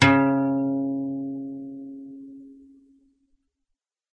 Single note played on an acoustic guitar from bottom E to the next octave E